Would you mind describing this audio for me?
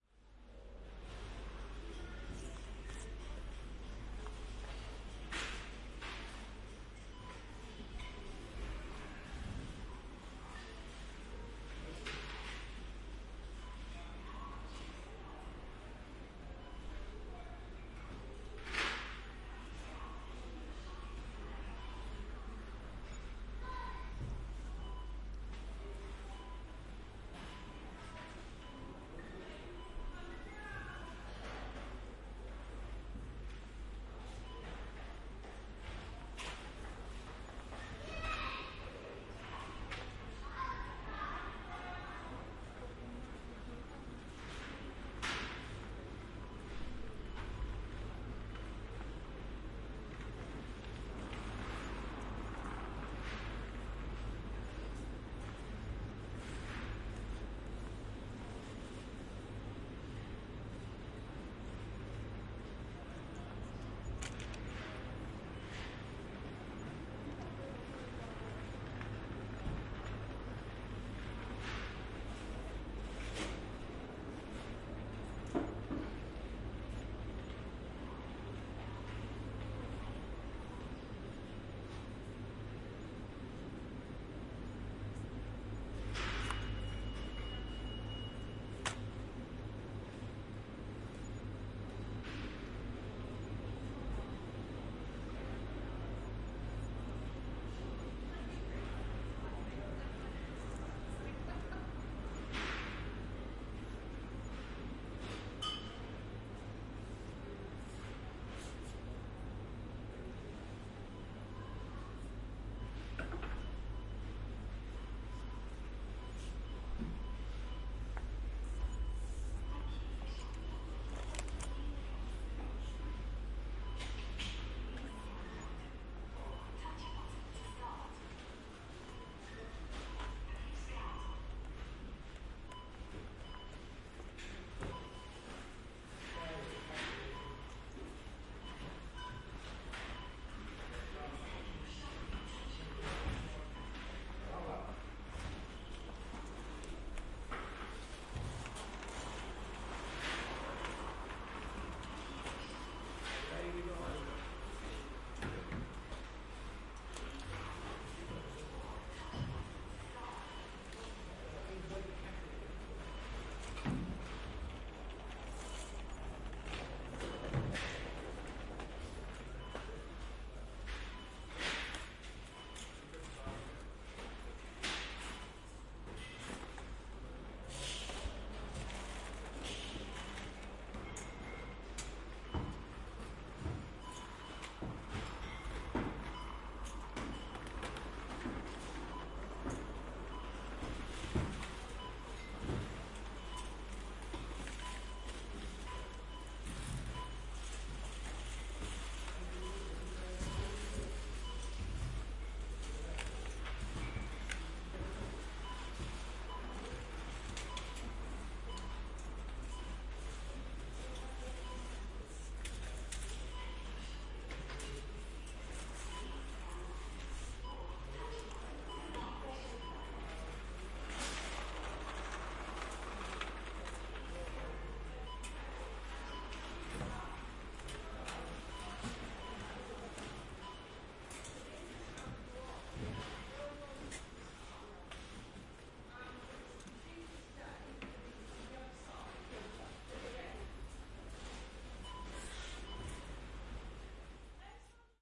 UK Supermarket Ambience
This is a snippet from the full length recording of my weekly shopping trip. This audio is just the bit where I was standing in the queue waiting.
Zoom H1, Roland In-Ear Mics, no filtering.
shopping, field-recording, uk